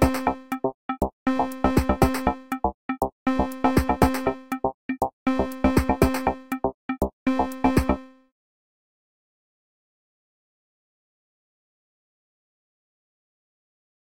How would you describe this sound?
Bou game
Video game music.
Merci